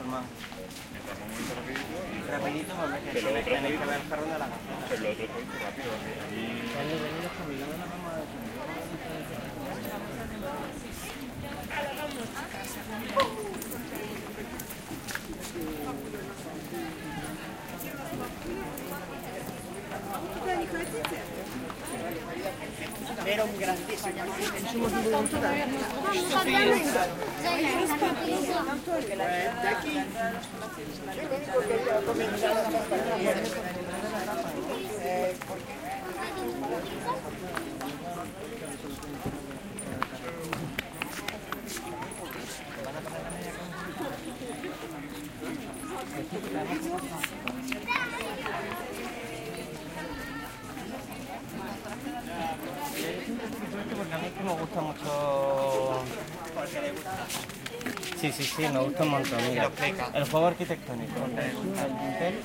a noisy tourist crowd talks near Palacio de Carlos 5th, Alhambra de Granada, S Spain. Soundman OKM mics, FEL preamp and Edirol R09 recorder
ambiance, field-recording, granada, south-spain, spanish, tourists, voices